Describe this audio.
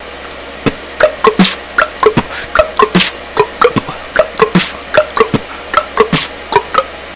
hollow clop beatbox
similar to block click sound
clop, dare-19